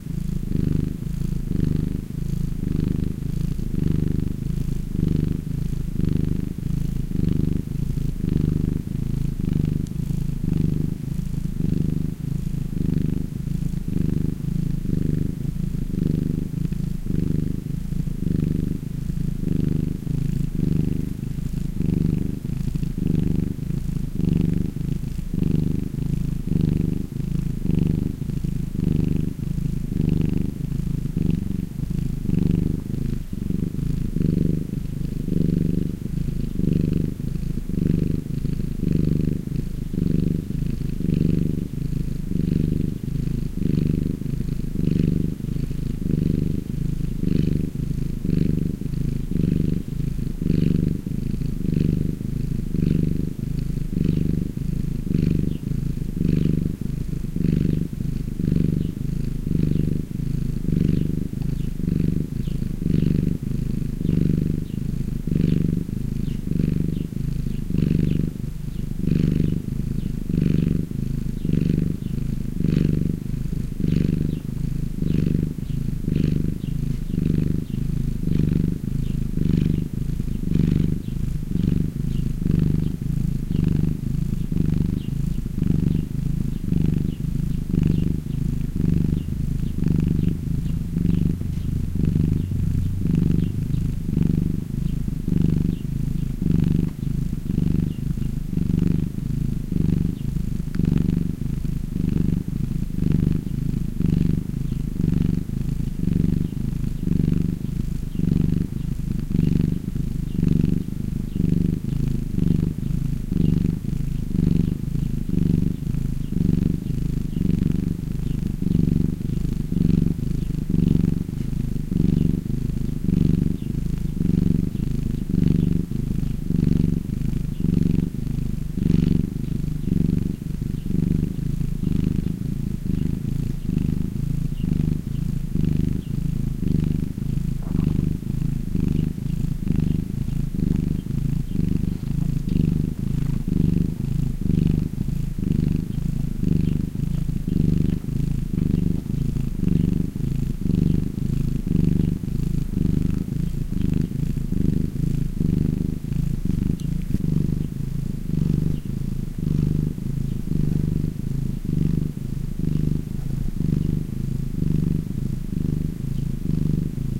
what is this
A sleeping cat's purr with the sound of a small bird tweeting in the distance. Recorded with Zoom H2 build in microphones.